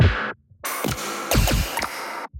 glicz 0001 1-Audio-Bunt 4
breakcore, bunt, NoizDumpster, lesson, synth-percussion, lo-fi, tracker, synthesized, square-wave, digital, electronic, harsh, glitch, noise, drill, rekombinacje